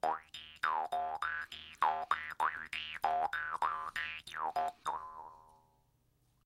jaw harp8
Jaw harp sound
Recorded using an SM58, Tascam US-1641 and Logic Pro
bounce, funny, harp, silly, twang